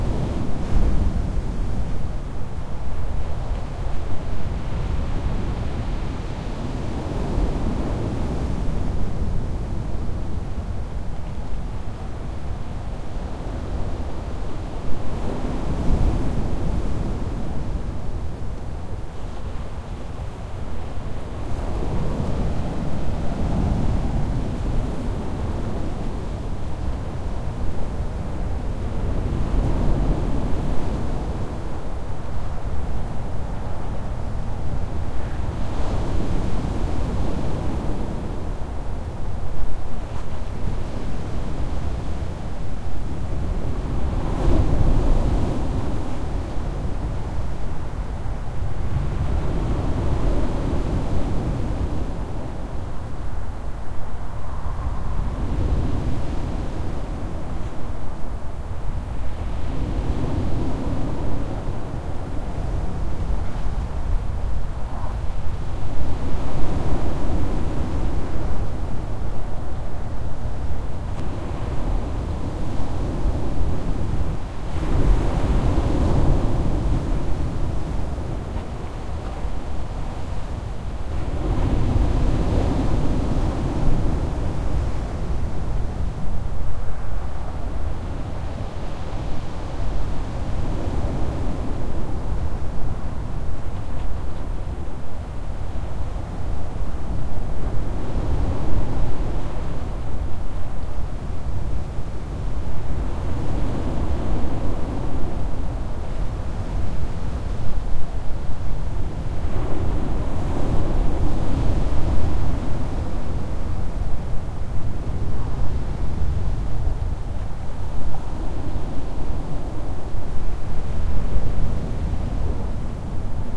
Wind and distant crashing waves from cliff top. 02
Crashing waves on a sandy beach and wind recorded from a 40 foot clifftop using a TASCAM DR-05. I had a wind muffler over the mikes and the recording is left open at both ends without fade for your own editing. Enjoy.
Crashing-waves, Waves-and-wind, Coastal-sounds